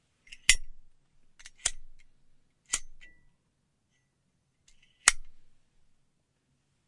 noise of a cigarette lighter, recorded using Audiotechnica BP4025, Shure FP24 preamp, PCM-M10 recorder
flame, collection, zippo, tobacco, clipper, gas, smoking, disposable, lighter, cigarette